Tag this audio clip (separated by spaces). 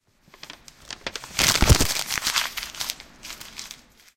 UPF-CS12 rubish paper